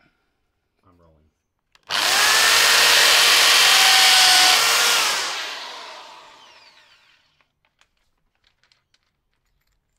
electric drill recorded fairly close